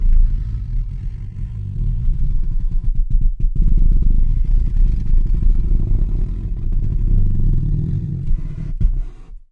rustle.box-Lgrowl 2
recordings of various rustling sounds with a stereo Audio Technica 853A